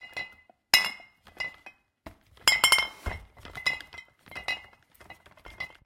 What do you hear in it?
Glass bottles in the box.